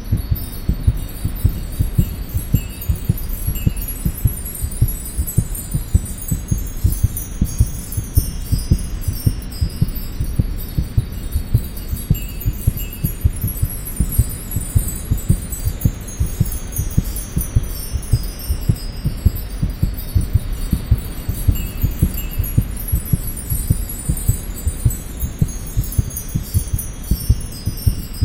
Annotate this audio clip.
Skyrim Healing
Made for "Skyrim IRL" from Cyberkineticfilms. This is the main healing sound from Skyrim. Mixed from other sounds, it closely resembles the sound of the spell in the Game
dovah, dovahkiin, dragon, elder, IRL, mage, magic, scrolls, skyrim, spell